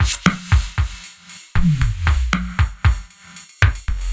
reverb short house beat 116bpm with-01
reverb short house beat 116bpm
dance, 116bpm, beat, loop, electronic, rave, club, house, electro